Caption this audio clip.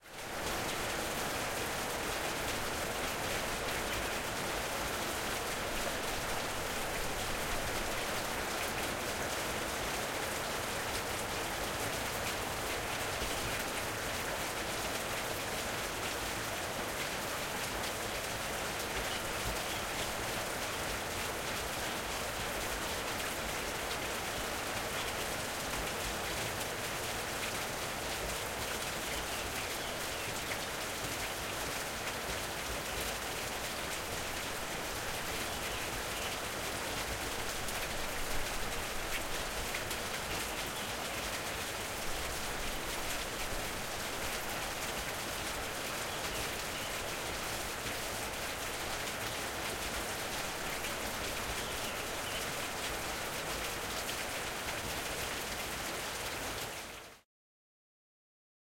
rain heavy early morning 01
this is part of a series of rain and thunder sounds recorded at my house in johannesburg south africa, using a zoom h6 with a cross pair attachment, we have had crazy amounts of rain storms lately so i recorded them with intent of uploading them here. a slight amount of eq has been applied to each track.
birds, morning, rain, suburban